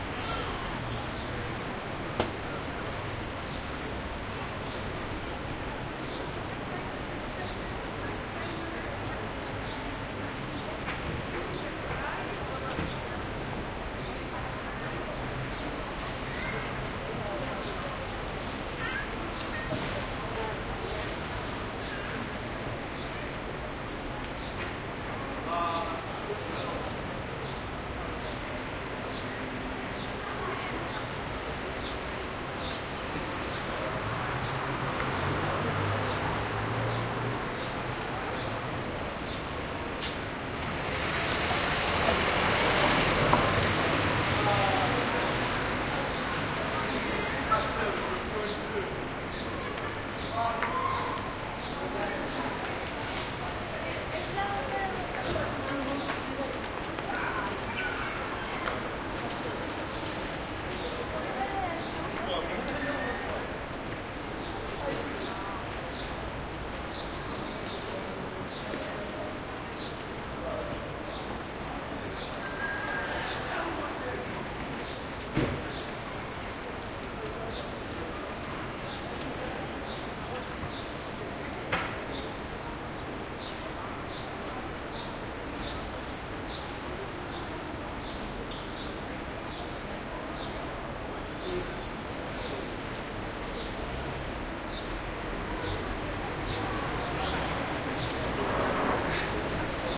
city square calm traffic wet quality and distant people in front of opera Marseille, France MS
calm, city, France, quality, square, traffic, wet